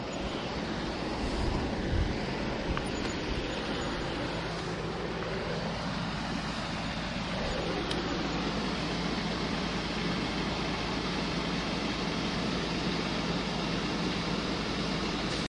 florida reststop mile303 out
Outside the rest stop on mile 303 on interstate 95 recorded with DS-40 and edited in Wavosaur.